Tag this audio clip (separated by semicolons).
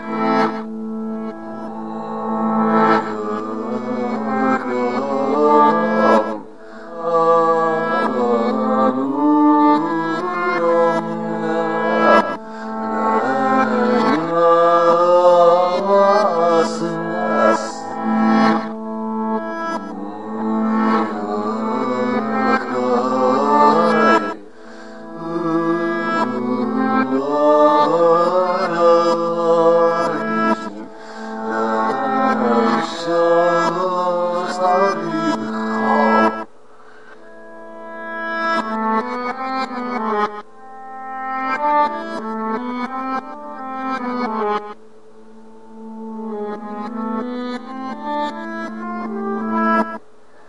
creepy; horror; reverse; sad; sound